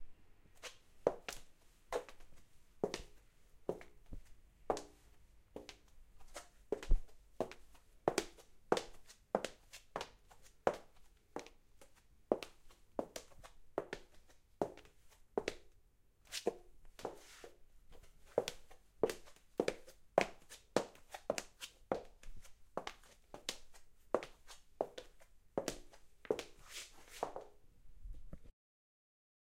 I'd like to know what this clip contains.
walking on floor with heals
woman in heels footsteps